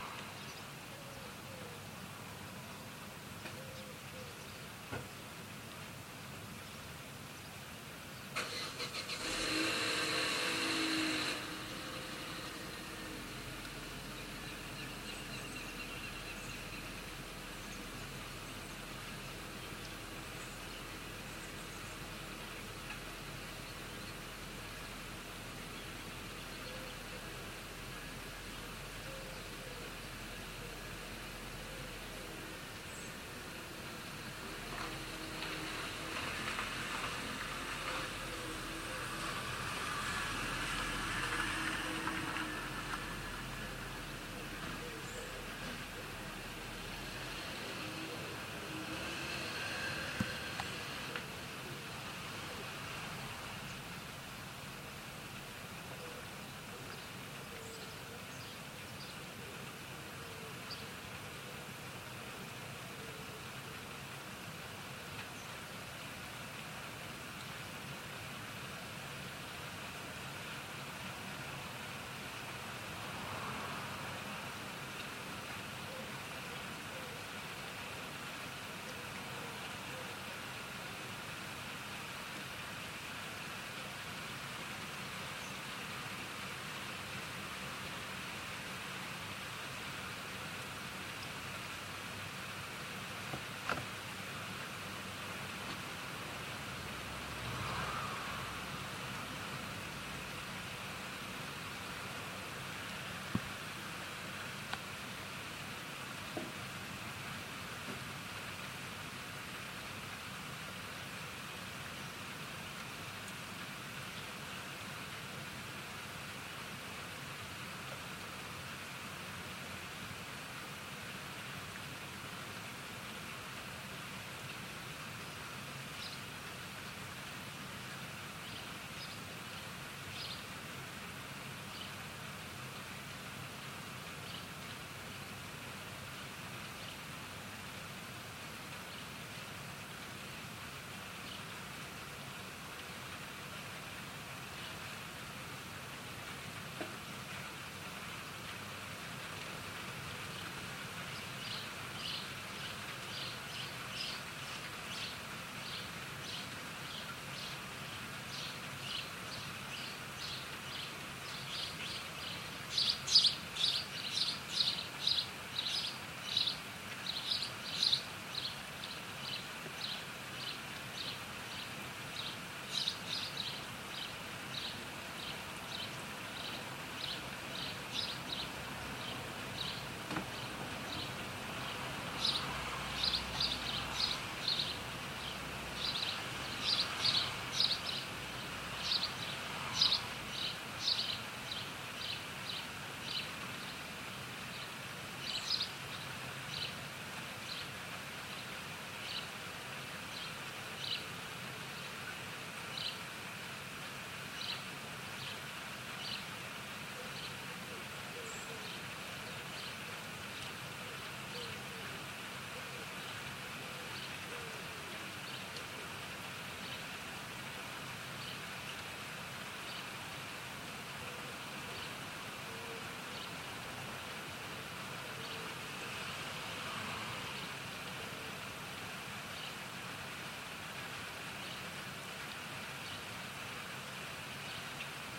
19072014 early saturday morning
An early Saturday morning with lots of birds, traffic, and a car pulling out of drive. Quite simple really
birds, field-recording, traffic, morning, ambience, car